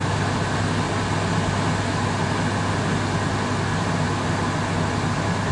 Kitchen hood
kitchen, hood